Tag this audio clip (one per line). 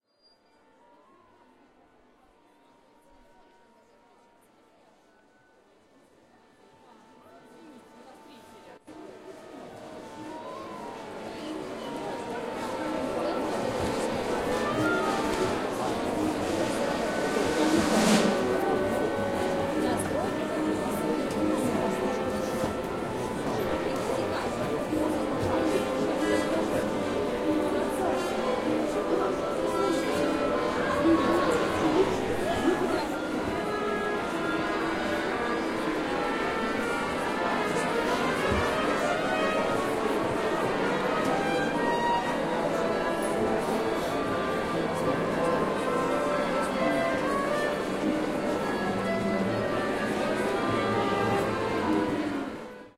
strings
theatre